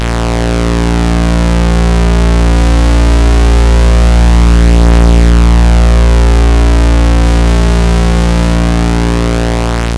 squaresweep2-labchirp
Operator 1 is set to "Square", and Operator 2 is set to "Saw Down".
Created using LabChirp, a program that simulates a 6-operator additive synthesis technology.
video, laboratory, duty-sweep, loopable, sweep, modulation, electronic, ambeint, 8-bit, LabChirp, duty, videogame, experiment, game, drone, sound-design, experimental, digital, sci-fi, sweeping, robot, loop, noise, ambient, PWM, video-game